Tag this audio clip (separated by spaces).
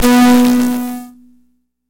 analogue bitcrushed circuitbending distorted glitch percussion pianola snare snare-drum toy